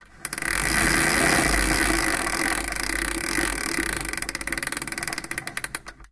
Cuckoo clock's second chain being pulled to wind the musical box mechanism.